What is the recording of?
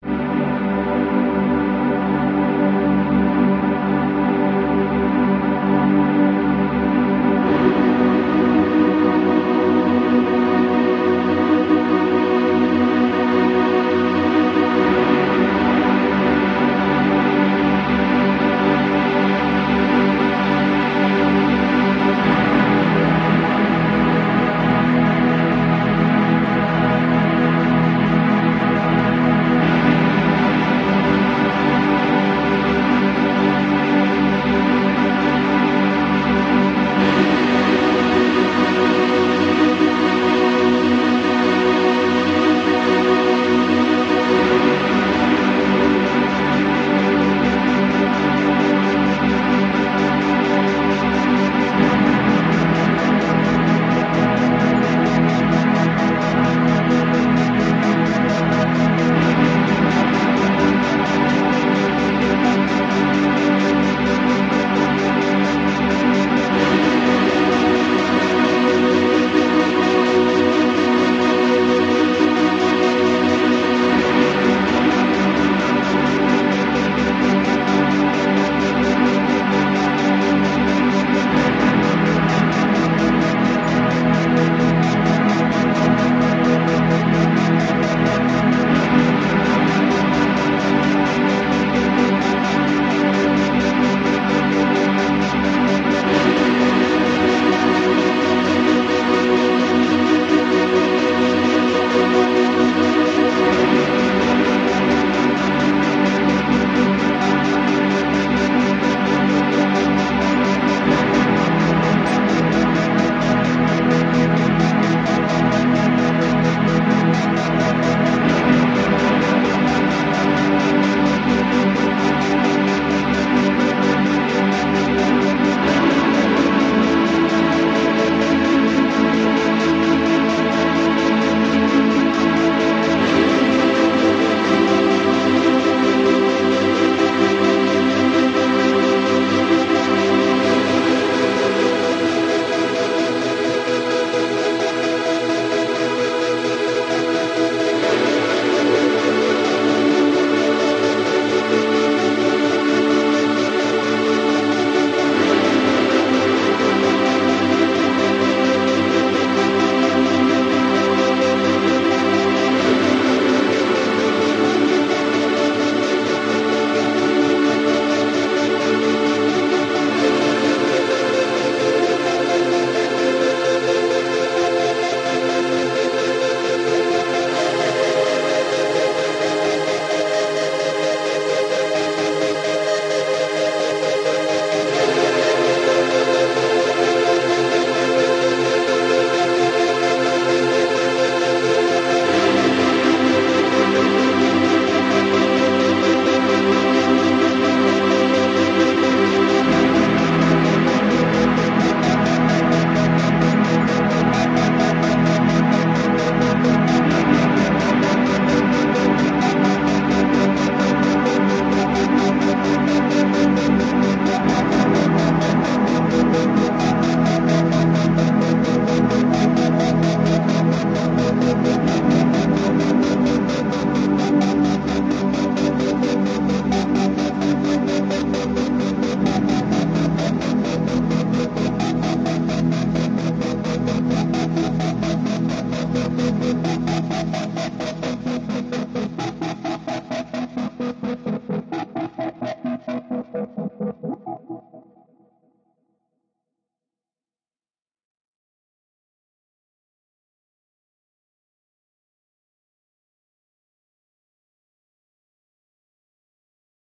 CWD LT ambient 12 intercepting
soundscape, ambient, space, dark, ambience, sci-fi, science-fiction, sfx, cosmos, melancholic, drone, pad, epic, fx, deep, atmosphere